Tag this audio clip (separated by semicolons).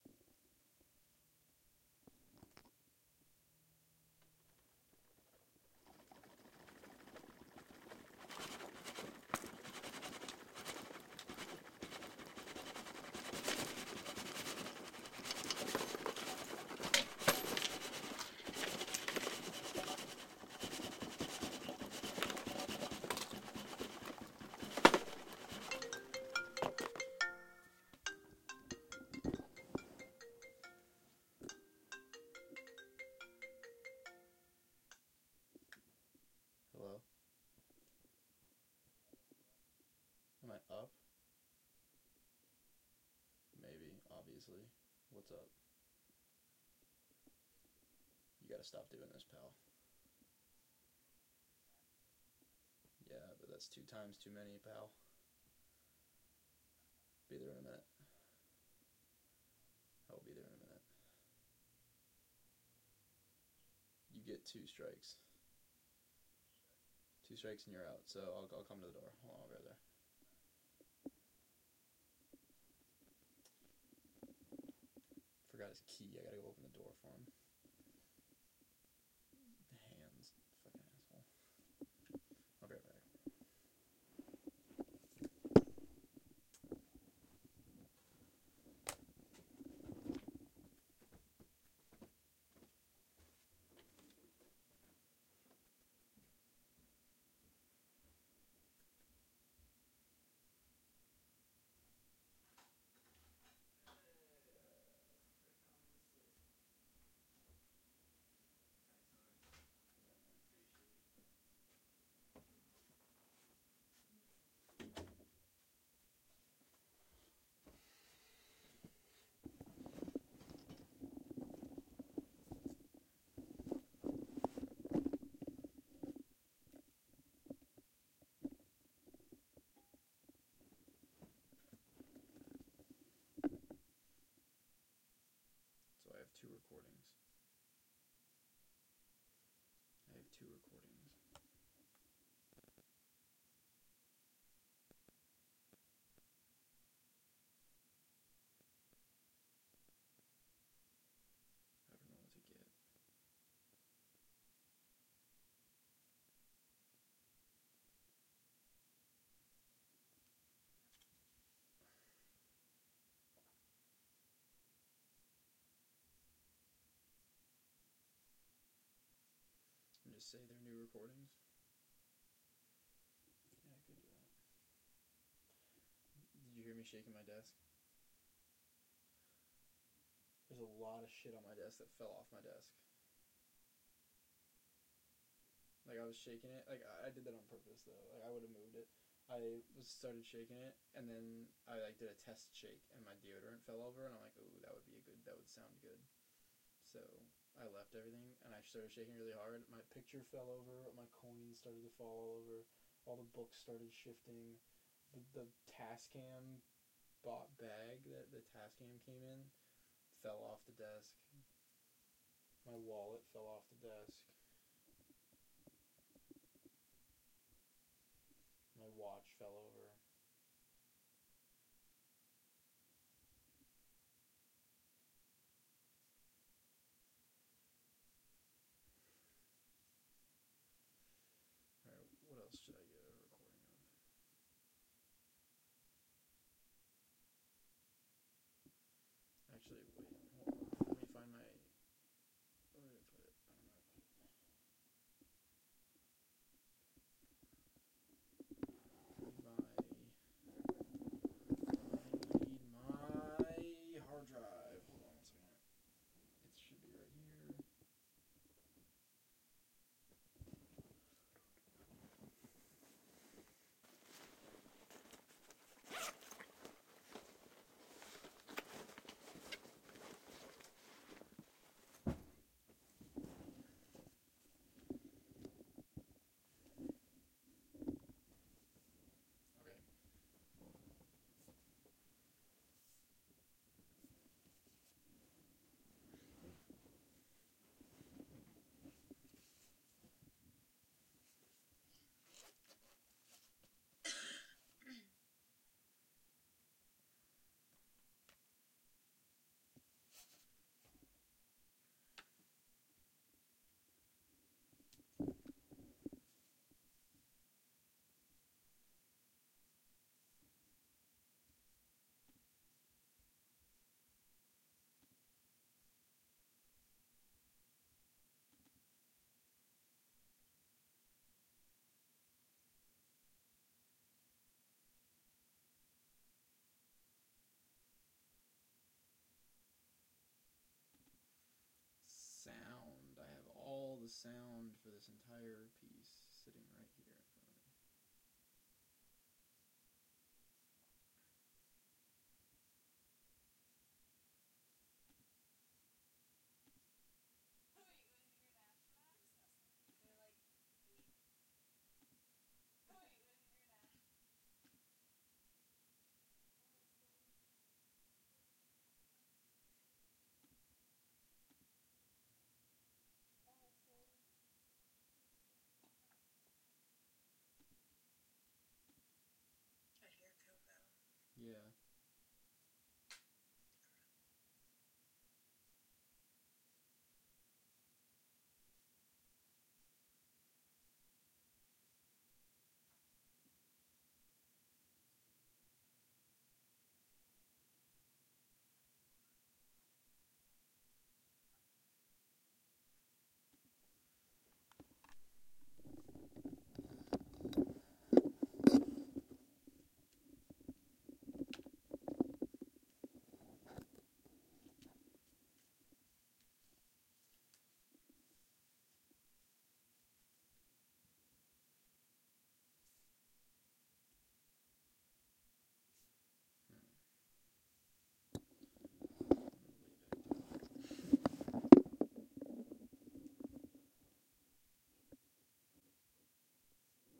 horror; horror-effects; shake